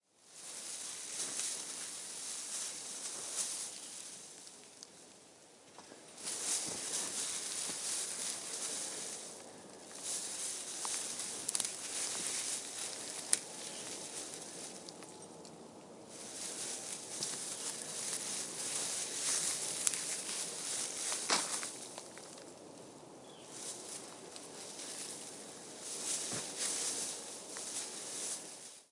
Pine tree branch light leaves move
light movement of small Christmas tree